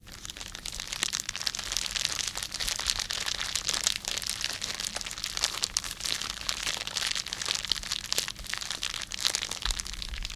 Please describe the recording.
BEGUE Guillaume 2013 2014 Crackling Fire1
Recording with dynamical microphone , scratching with a plastic bag
Sound 10,35sec
Apply Fed In and Fed Out
Apply Normalize
/// Typologie (P. Schaeffer) :
Contenu complexe
/// Morphologie:
Masse: Groupe nodal
Timbre harmonique: clair
Allure: Pas de vibrato
Dynamique: Multiples attaques successives